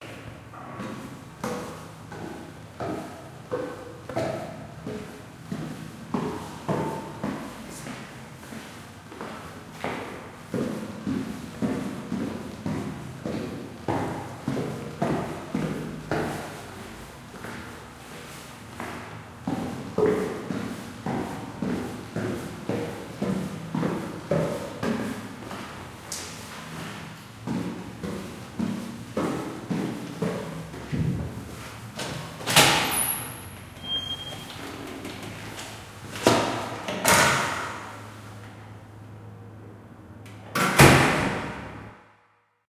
Walking Down Stairs
cold, stairs, steps